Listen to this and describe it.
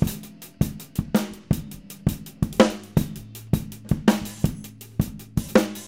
surf-quiet-loop
A loop of a kind of surf-like, 60's-like rock beat, breakdown section